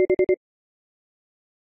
4 beeps. Model 1
beep, futuristic, gui